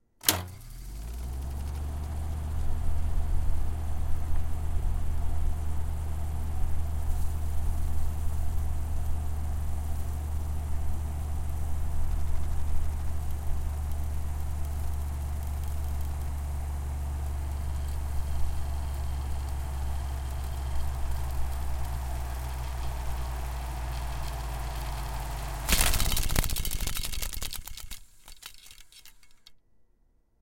reel to reel tape machine start stop rewind with spinout flappy
flappy, tape, spinout, rewind, reel, stop, machine, start